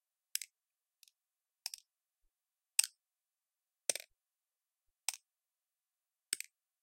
Lite wood sound
impact, open, wooden